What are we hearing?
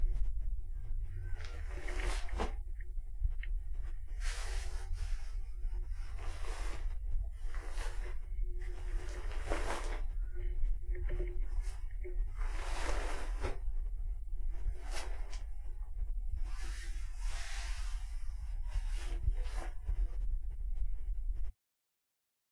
Ruffling bed sheets calm
Used for someone moving around on a bed and or making up the bed.
clam, hands, human, slow, soft